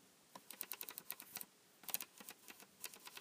Turning Switch
lamp, switch, turn, push, flick, off, click, light, button, fluorescent, switching, light-switch, switches, flicking, toggle